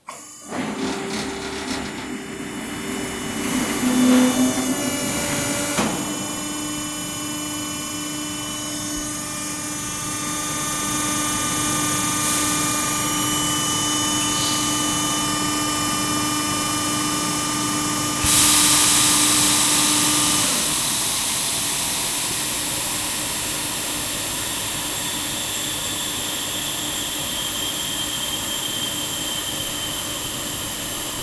Laser LiftMaster Pallet Swap Out
Buzz, electric, engine, Factory, high, Industrial, low, machine, Machinery, Mechanical, medium, motor, Rev